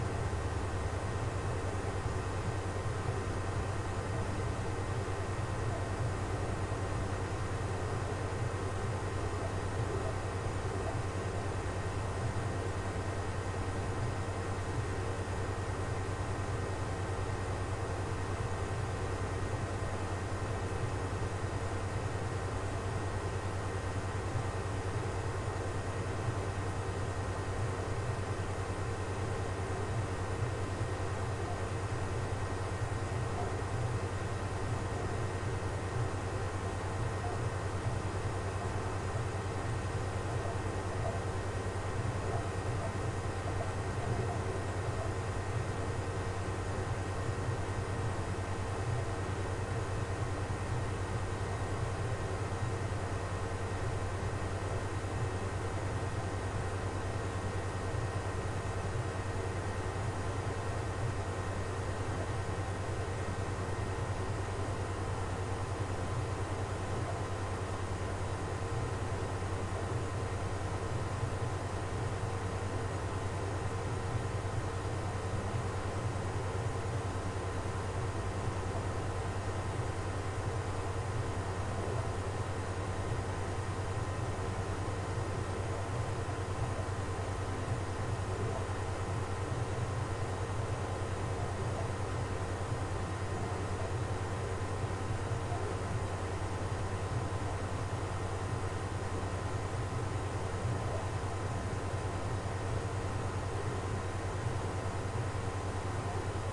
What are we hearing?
Ambient Kitchen loop
Modern noise - a quiet kitchen at night in an urban surrounding, and the constant sound of a big Samsung fridge. Very distant TV sounds and voices.
Recorded with a Blue Yeti on the floor (omnidirectional mode I think), cut to make it loop cleanly and boosted up a bit with Goldwave. I kept it short (less than 2 minutes) to make it easier to manipulate in my video editor timelines. Hope someone finds it useful.
night, urban